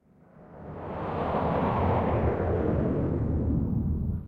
Processed versions of female voice sounds from "phone" sample pack mangled beyond recognition. Processed in Cool Edit, voyetra record producer and advanced audio editor. Lost track of steps in mangle process.
extreme, female, processed, voice